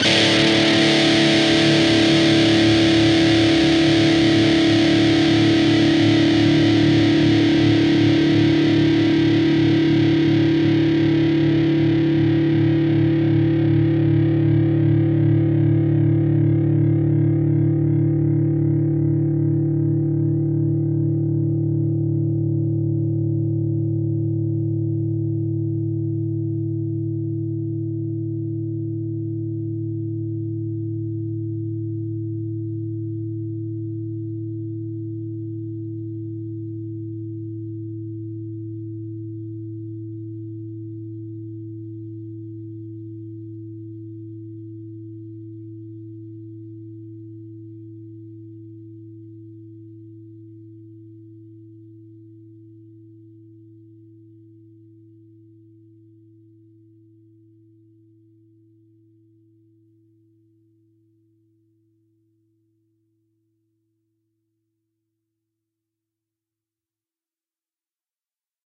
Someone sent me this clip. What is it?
Dist Chr A&D strs up
A (5th) string open, and the D (4th) string open. Up strum. Palm muted.
chords, distorted, distorted-guitar, distortion, guitar, guitar-chords, rhythm, rhythm-guitar